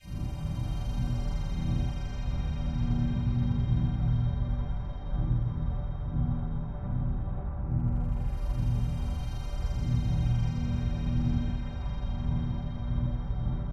Viral London Nights
Stretched and Affected Synths
atmospheric, cavernous, drone